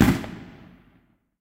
Explosion sound effect based on edited recording of fireworks on Bonfire Night circa 2018. Recorded using Voice Recorder Pro on a Samsung Galaxy S8 smartphone and edited in Adobe Audition.
boom, explode